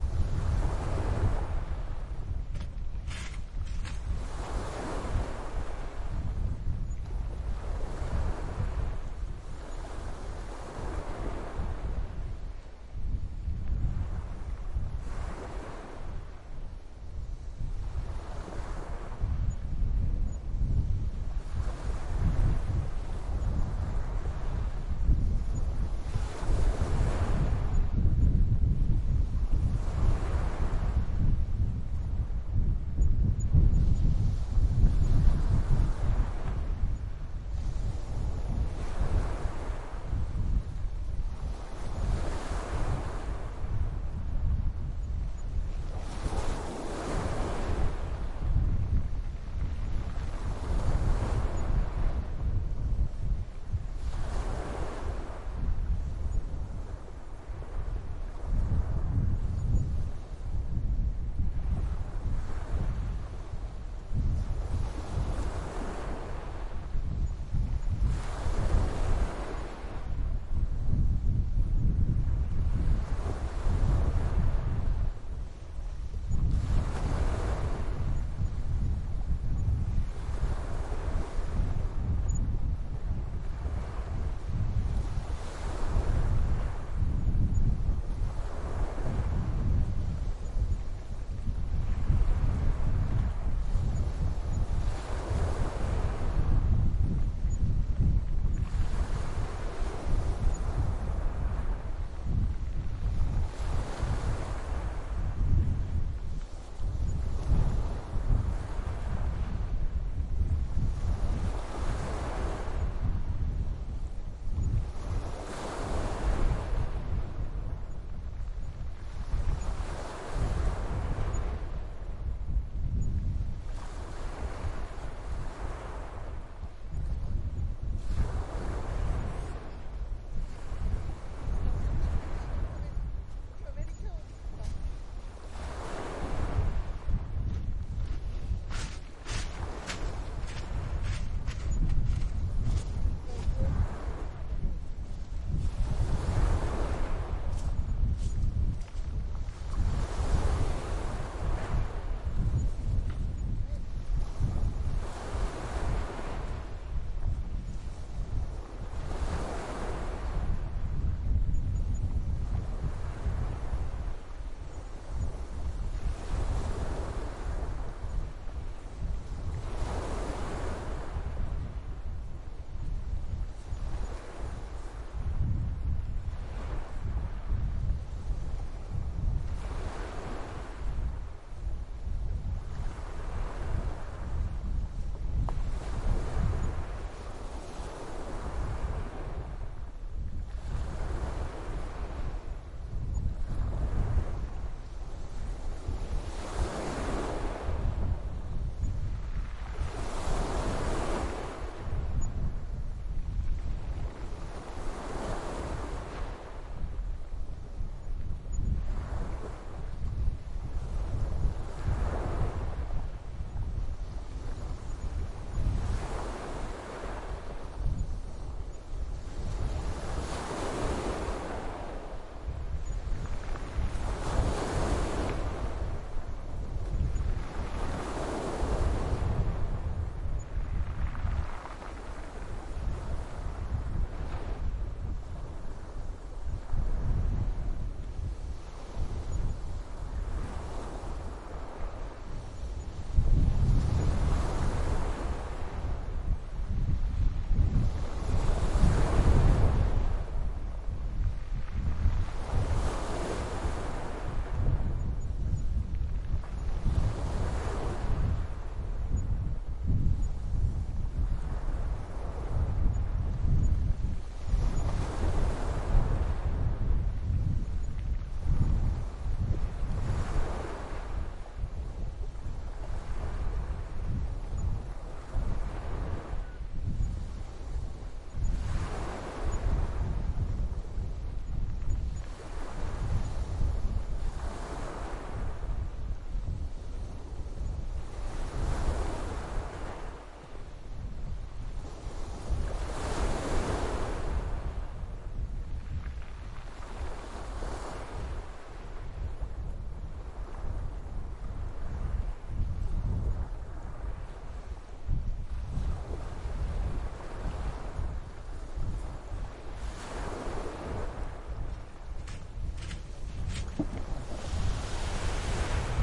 Sea soundscape, somewhere near Ancona, Italy.
Recorded with Zoom H6, xy capsule
ancona, beach, coast, field-recording, italy, marche, sea, seaside, shore, shoreline, soundscape, stones, water, waves